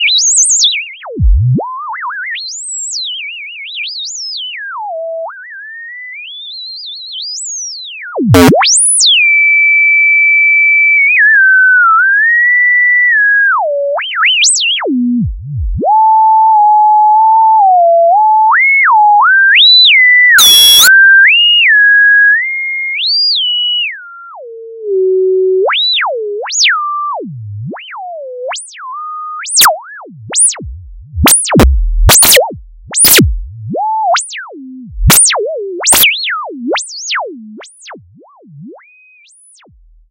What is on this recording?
sweep03 careful
Sweeps created in SuperCollider, controlled the movement of the mouse.
electronic, sound, supercollider, sweep